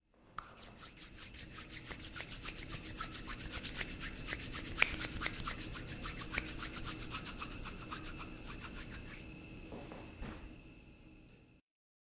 Rub Hands
I rub my hands together.
MTC500-M002-s13, rubbing, hands